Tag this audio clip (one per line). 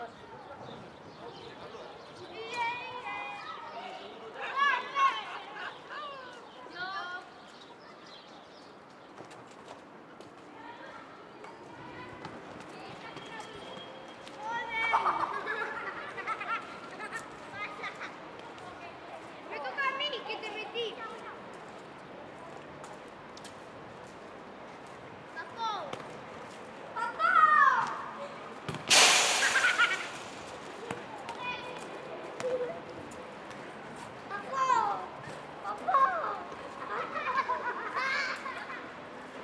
childs
playground